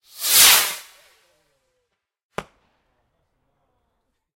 Firework - Take off - Small pop
Recordings of some crap fireworks.
Bang, Boom, Firework, Fizz, fuze, ignite, pop, rocket, whoosh